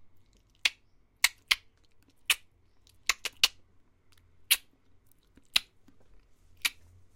The sound that some people make around horses. Also a probably poorly executed click sound in some African languages like Xhosa.
But I would appreciate a word in the comments about what kind of project you plan to use it for, and -if appropriate- where it will probably appear.